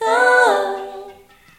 stephanie hatten sings through a vocal effects pedal
ooo, stephanie, vocal